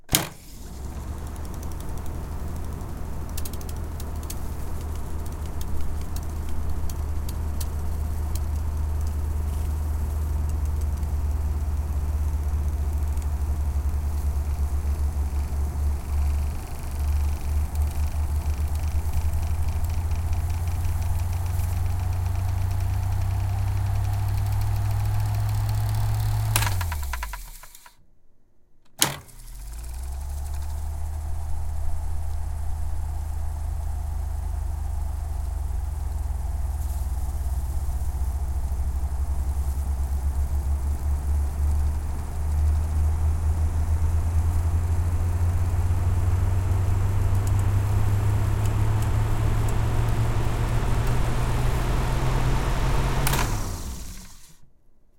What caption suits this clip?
reel to reel tape machine start stop rewind ffwd close no wind noise
machine, reel, stop, start, ffwd, tape, rewind